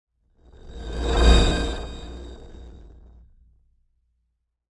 Cyber Swoosh 22
A sound that is ideal for video transitions. Made using the program Ableton Live.
sfx, sound, swish, swoosh, Transition, woosh